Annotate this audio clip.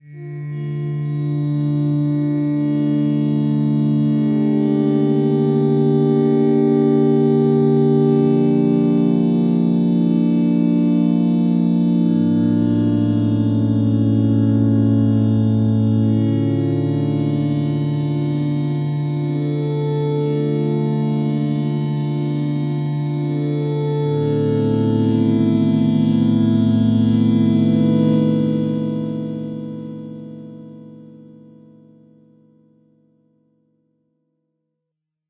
i wrote a short piece for piano and then while messing in fl studio dx10 i increased attack decay and release parameters and it came out like this
ambiance, dark, effect, mystery, sound, suspense